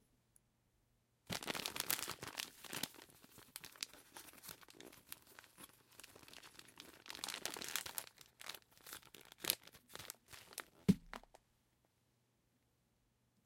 A paper bag filled with tea 1
Sound of opening a paper bag filled with moshi moshi tea. Lots of crinkling.
bag, crumple, paper, paper-bag, rustle, rustling, tea